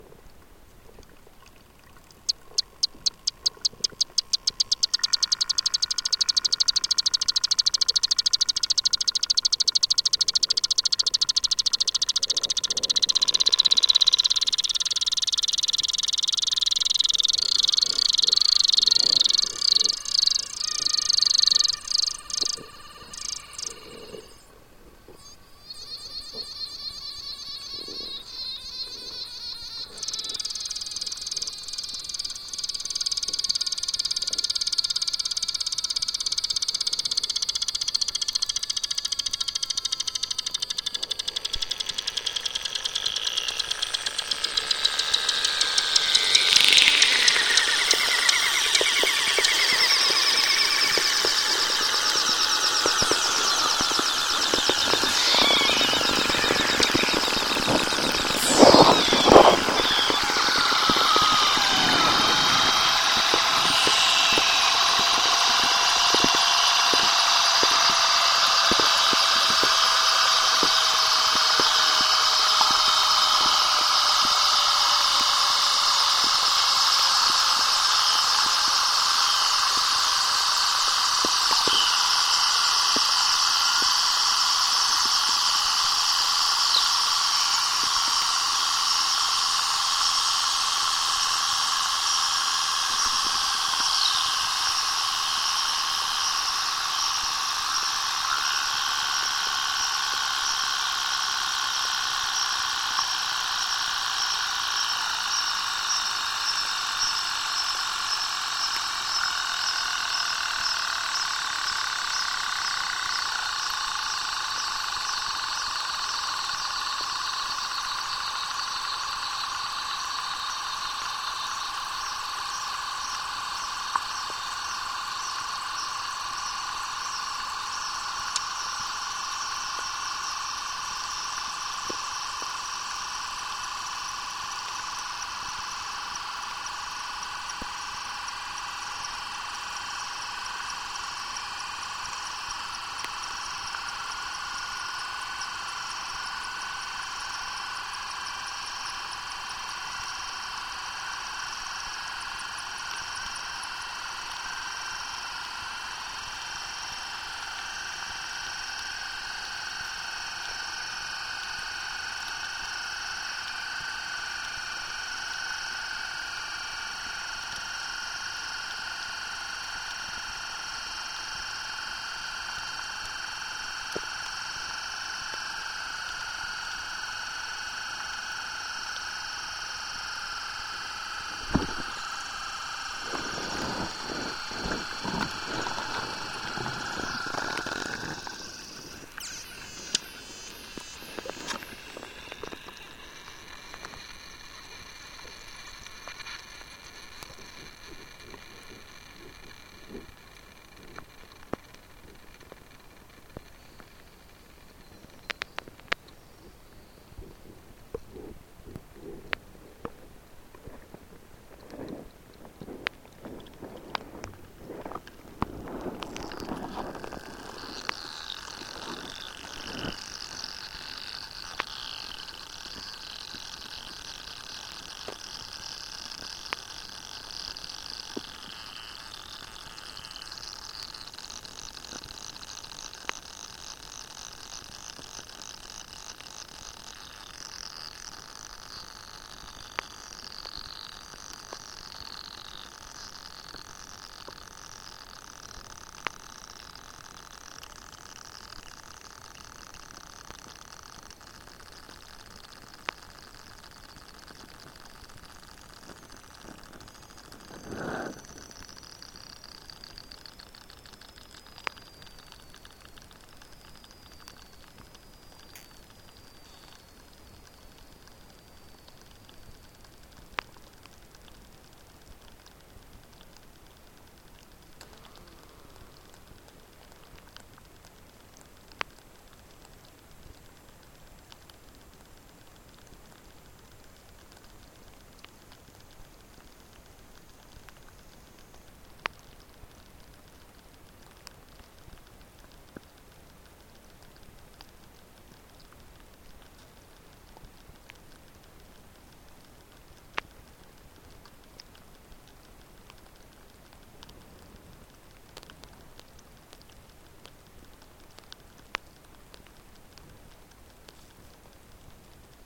Earthenware vessel placed in a spring
An earthenware (ceramic) vessel submerged under water. The vase has been fitted with a contact microphone and the sound is produced by air escaping its pours as they are filled with water. The item is made from the clay sourced from the spring.